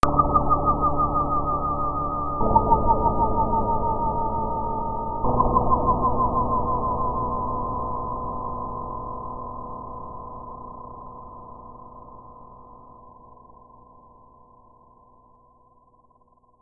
Lo-Fi Danger Jingle Video Game Computer System Sound Arcade 8-Bit
8-Bit, Jingle, Game, Computer, Sound, Video, Lo-Fi